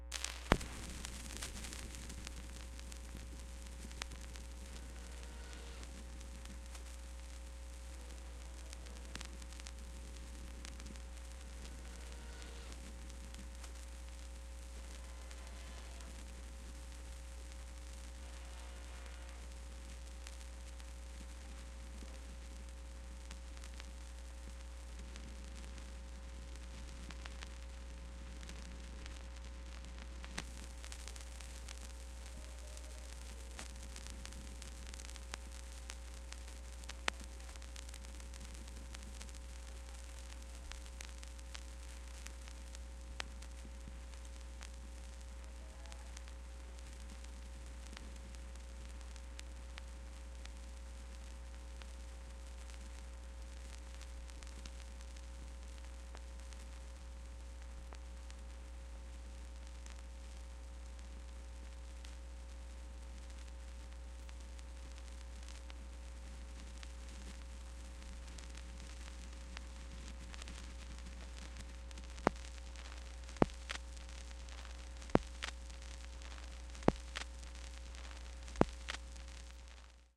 vinyl noise
All the track gaps from a record of the 60's cut together for some extended lofi record noise.
crackle, dust, hiss, lofi, lp, noise, pop, record, static, surface-noise, turntable, vintage, warm